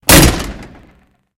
Fire door closing
The sound of a heavy fire door being slammed closed. Could be used for any heavy door closing, such as a vault or a van.
Recorded on a Zoom iQ7, then mixed to mono.